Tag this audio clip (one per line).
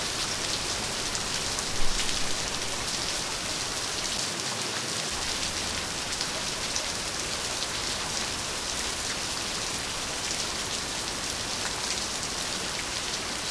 field-recording
rain